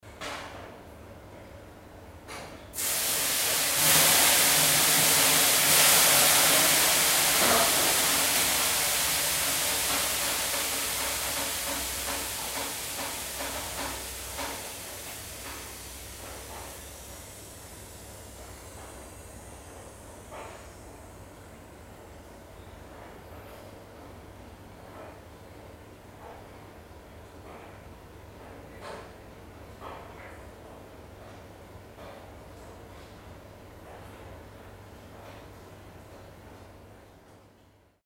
One shot steam in the sauna.